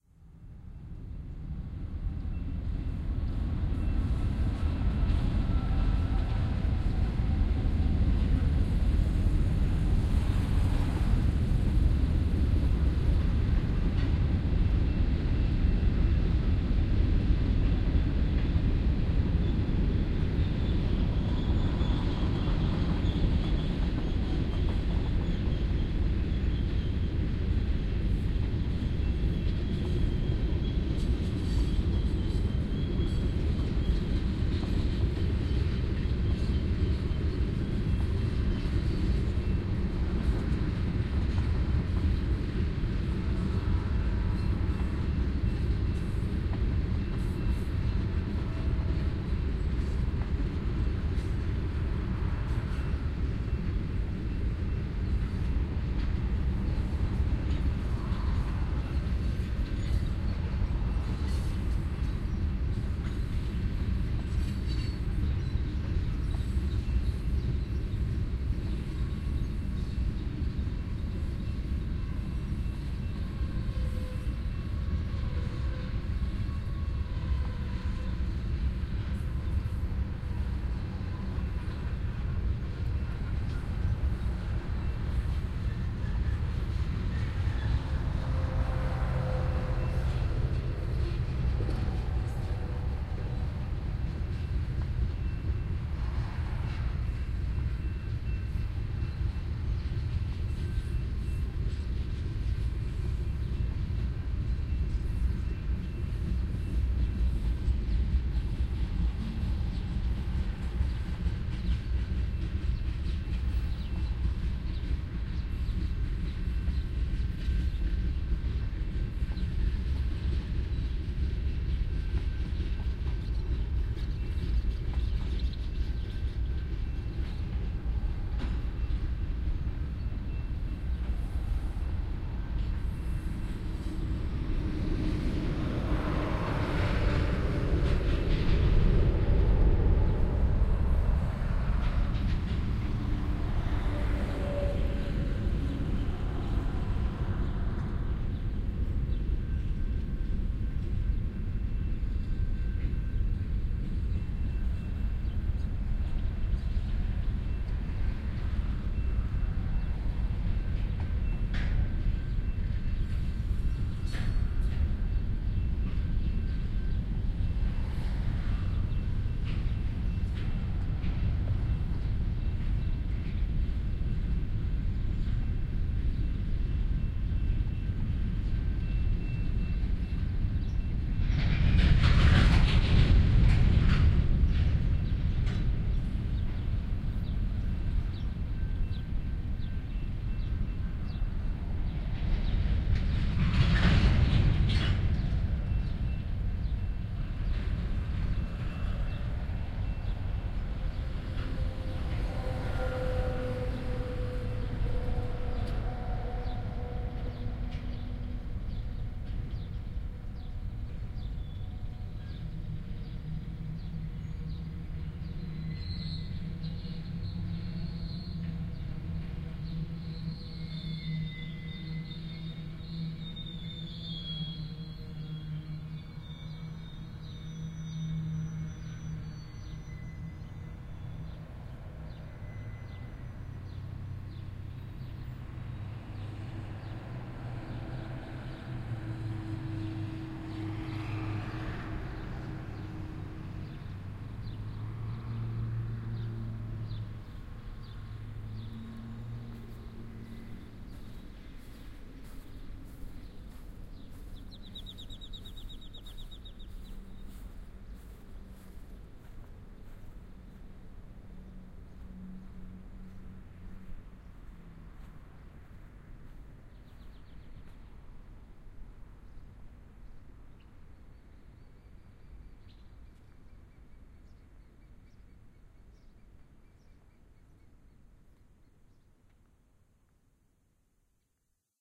Train wheels scraping against the track as it passes by. The crossroad signal and vehicles intermittently driving by are audible.
Equipment used: Sound Professionals SP-TFB-2 In-Ear Binaural Microphones > Zoom H2